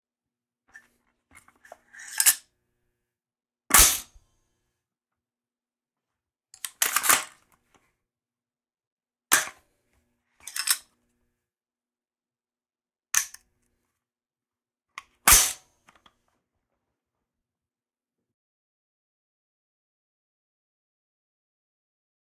AR15 chambering 2
chambering my rra AR15 .556